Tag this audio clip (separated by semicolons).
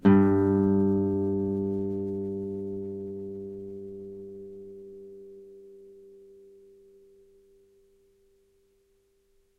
g; guitar; music; note; nylon; string; strings